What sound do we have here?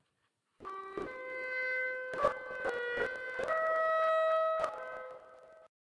Clarinet reverb estimation NML approach